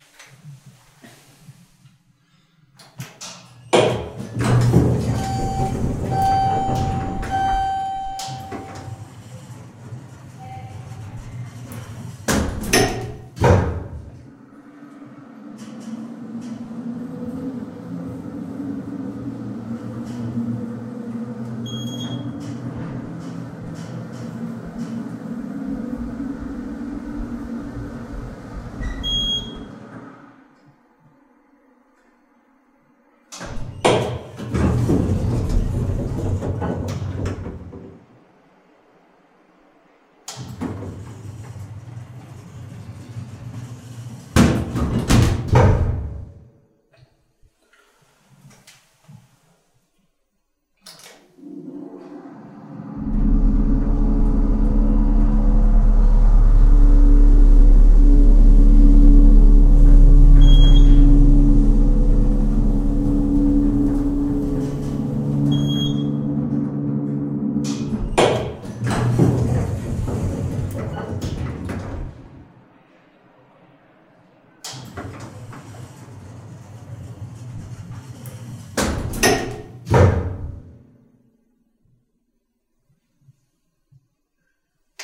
Elevator descending and then ascending two floors. No peaking, but the electric motor sound is somewhat weak, and the recording has been processed to remove noise. Recorded at Shelby Hall, The University of Alabama, spring 2009.